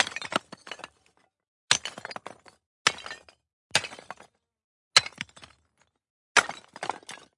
A couple of roof top ceramics break from impact. Recorded with a Sony PCM D100. Enjoy!